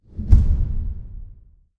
Large drum strike, suitable for film, film score, trailer and musical tracks. Made by closing a car door in an empty underground parking, with some eq and dynamics processing.